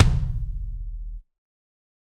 Kick Of God Wet 016
drum, drumset, god, kick, kit, pack, realistic, set